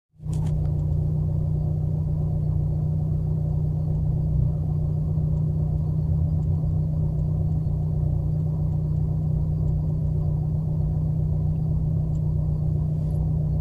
space drone fragment
experimental, soundscape, shadow, drone, space, ambient